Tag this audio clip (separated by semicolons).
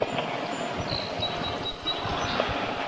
ambient; birds; mower